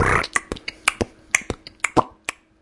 my hiphop beat1
beat box beat
beat, beatbox, box, dare-19, hit, human, perc, percussion